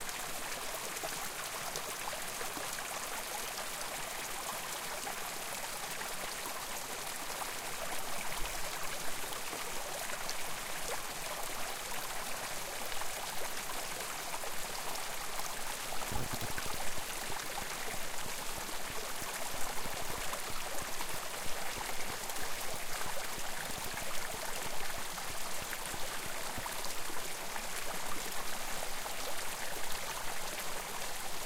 Recorded mid-day on 15 July 2018 of a tiny stream in the forest on the SW flank of Glacier Peak, WA.